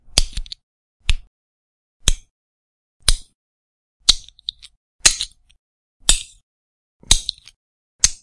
Multiple recordings of two LEGO Bricks hitting each other.
hit, lego, bump, brick